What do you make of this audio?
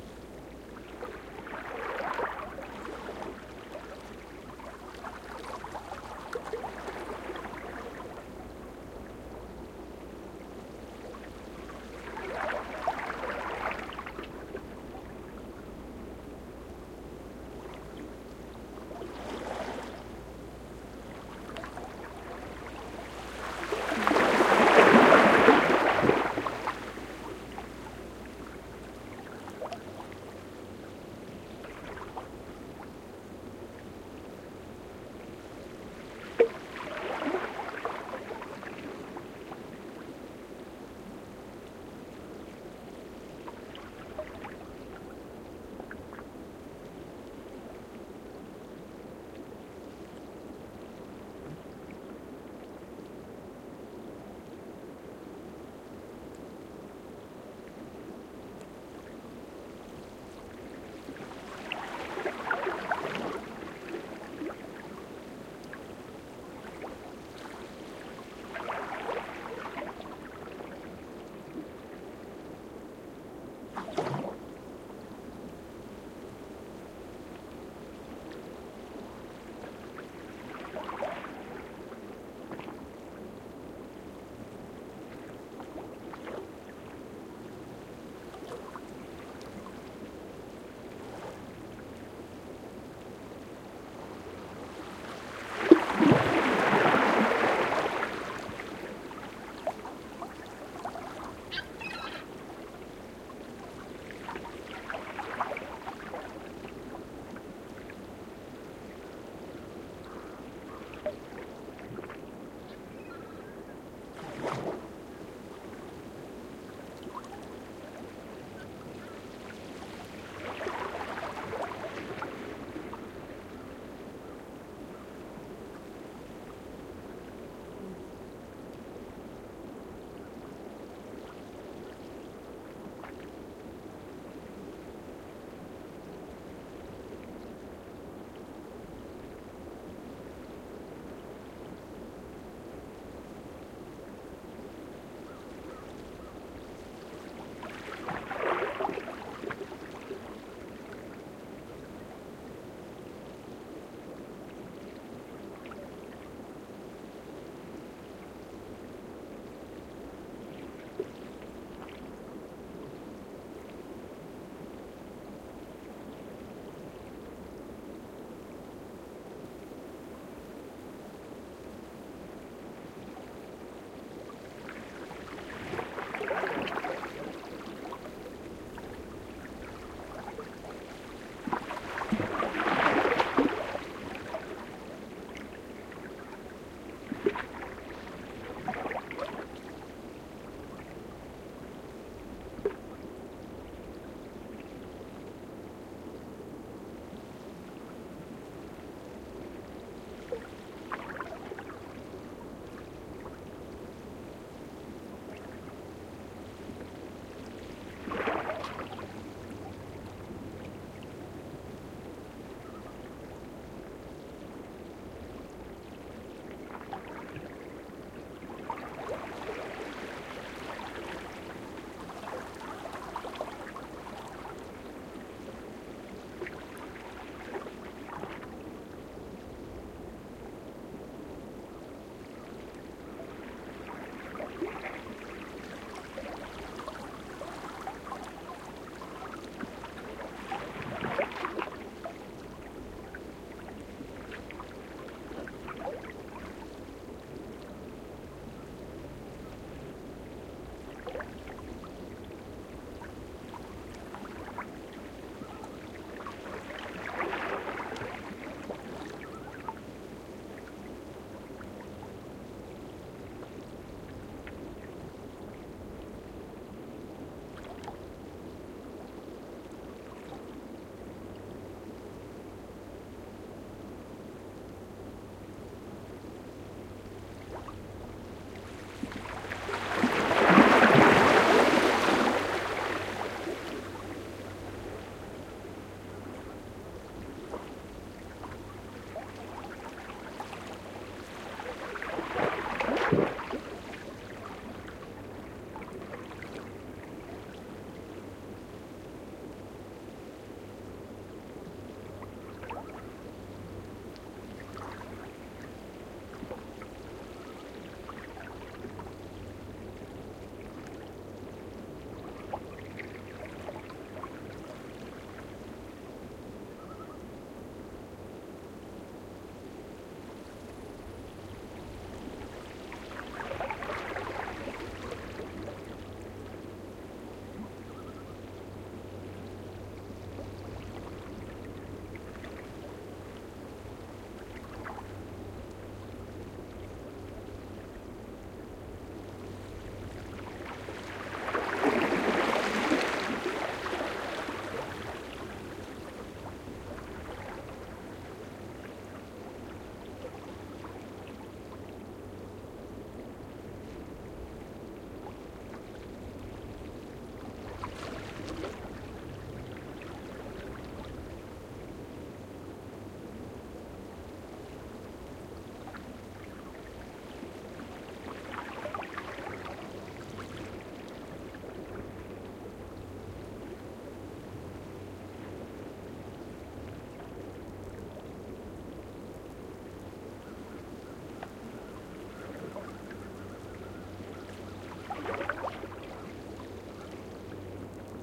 bay of fundy 03
Quiet waves lapping again some rocks on the Nova Scotia Side of the Bay of Fundy. Any static type of noises are coming from the water moving through the seaweed near the microphones. Recorded with AT4021 mics into a modified Marantz PMD 661.